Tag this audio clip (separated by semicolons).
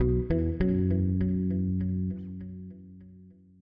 Acoustic; Acoustic-Bass; Bass; Build; Music-Based-on-Final-Fantasy; Sample